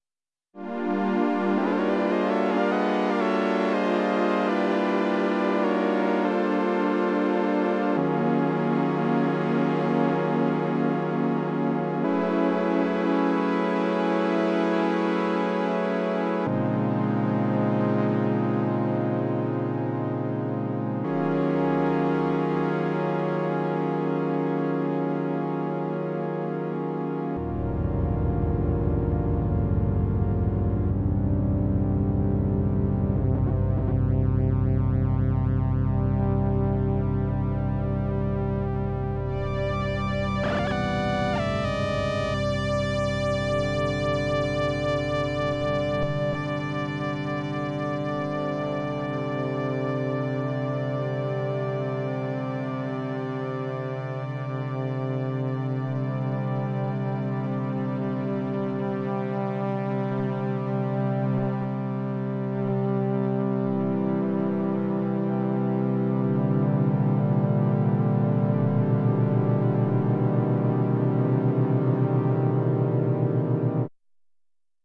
Oberheim Detuned Oscillators
Experimenting with detuning the oscillators on the monstrous Oberheim OB-8 analogue synthesizer. Would love to hear your re-purposing of it!
hardware, vintage, oberheim, OB-8, analog, ambient